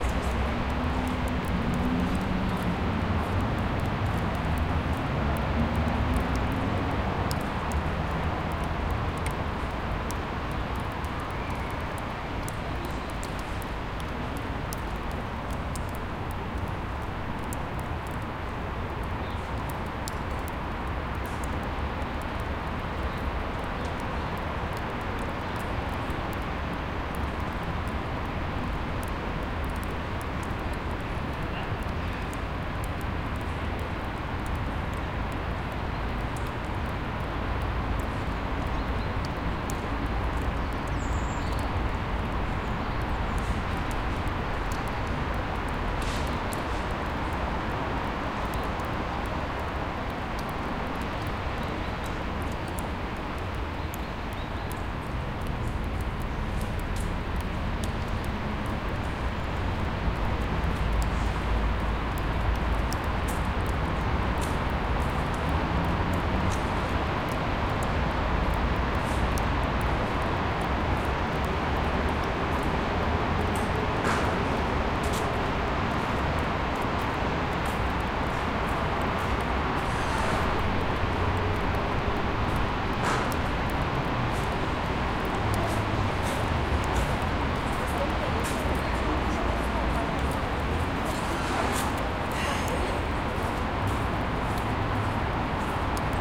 Noise of Novosibirsk street.
Recorded: 2013-11-19
XY-stereo.
Recorder: Tascam DR-40
ambiance, ambience, atmosphere, city, cityscape, field-recording, Novosibirsk, Russia, soundscape, suburb, suburban, town, urban